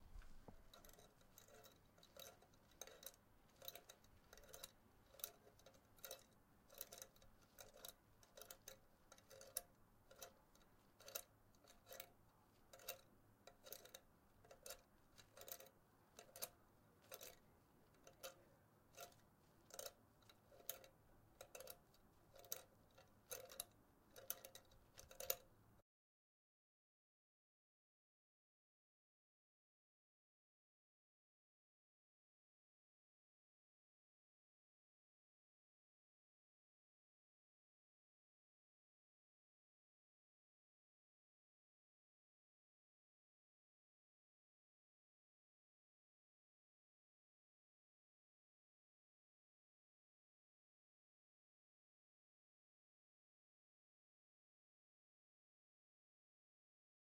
tighting a screw
a screw sound